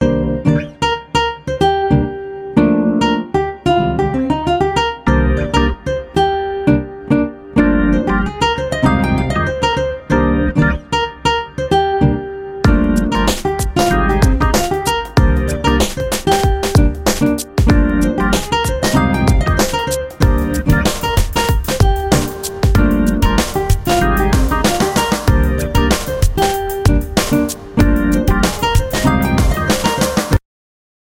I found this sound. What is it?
Can be used as Background music for Radio program or any other media program (dynamic) presentation.
Jazz, R, B, RapMix